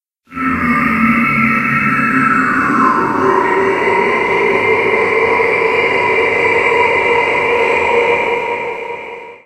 deep insane laugh
evil and mad laugh
dark, deep, evil, fear, gothic, horror, human, insane, laugh, laughter, mad, man, psychotic, scary, suspense, terrifying, terror, threatening, thrill